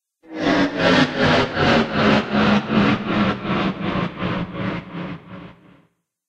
UFO Slowdown
A UFO slows down... A Sci-Fi sound effect. Perfect for app games and film design. Sony PCM-M10 recorder, Sonar X1 software.